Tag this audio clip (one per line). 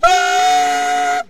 sax
sampled-instruments
woodwind
vst
alto-sax
saxophone